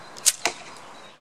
Arrow Release and Hit
Actual recording of a compound bow (child size) with an aluminum shaft arrow, blunt tip on a plastic and foam target. Approx. 9:30am, on a sunny brisk morning.
Releasefield-recording, Bow, Arrow